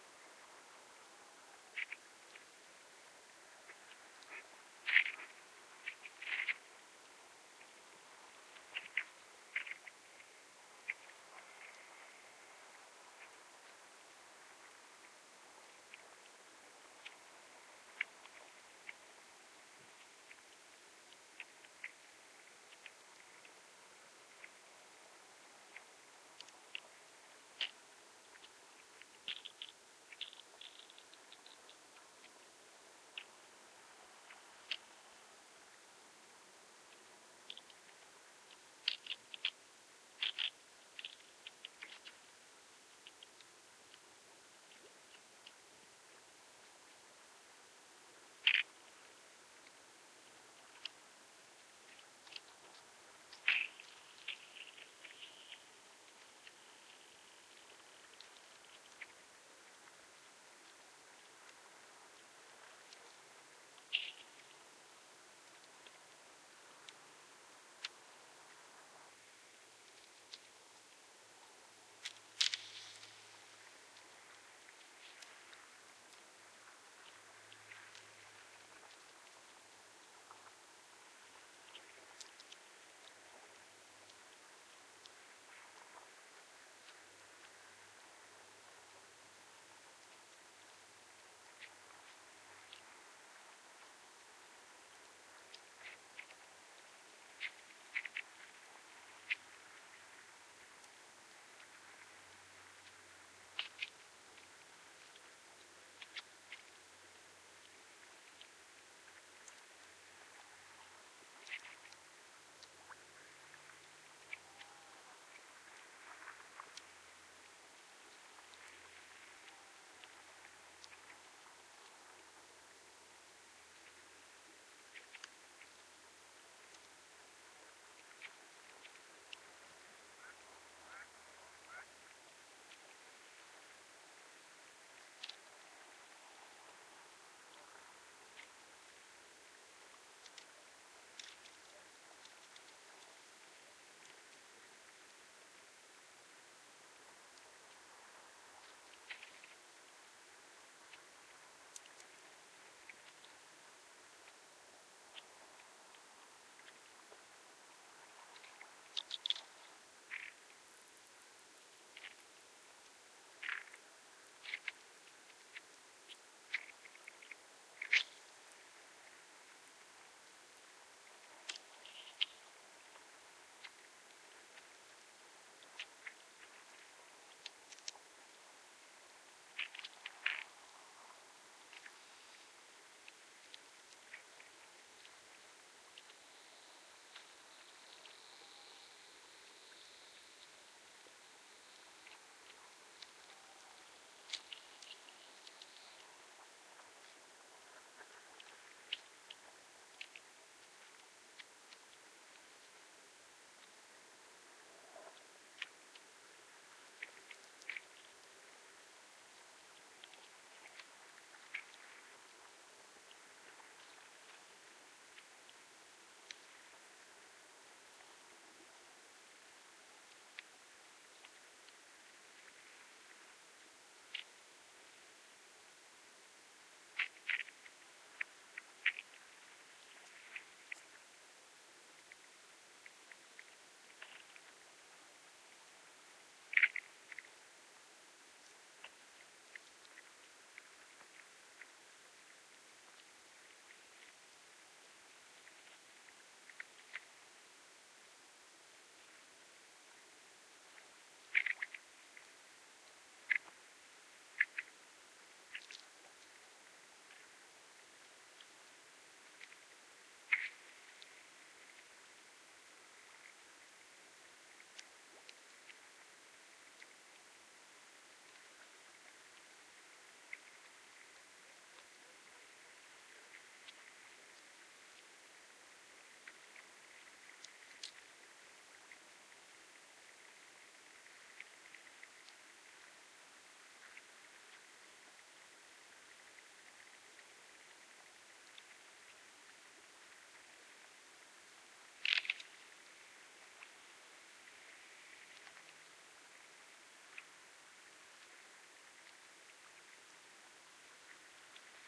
Tidal Marsh Ice
I was out on the marsh edge when I could hear a strange noise in the dark. It turned out to be ice breaking up in the tidal flow. A great test for the new pair of EM172 mics. in the parabolic, Olympus lS-5 recorder.
Ambient, cracking, Field-Recording, Ice, marsh, Tidal-Marsh, tide, Water